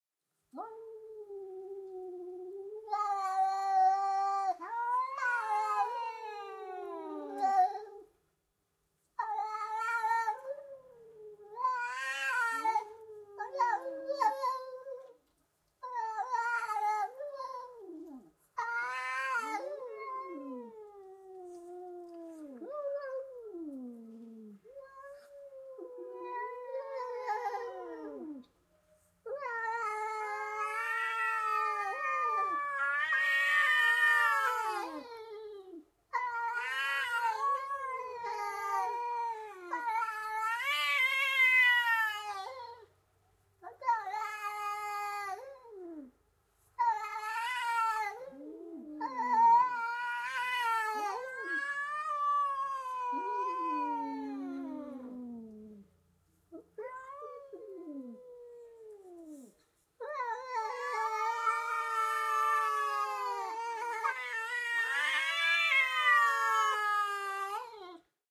Two cats scream and fight outside my window on the street